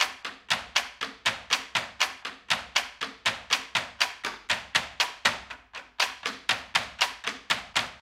Rim percussion for action or dramatic films. 120 BPM